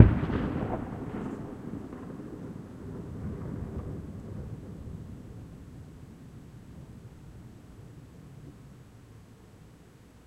Large explosion - dynamite during a fiesta in the Sacred Valley, Cuzco, Peru. Long natural mountain echo.Recorded with a Canon s21s.